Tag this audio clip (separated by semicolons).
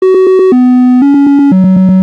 120; 8; bit; melody